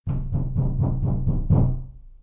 Heavy Door Pounding